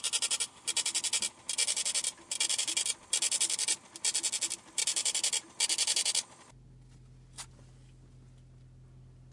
Taxi paper meter
a taxi meter machine printing the receipt and than the driver tearing it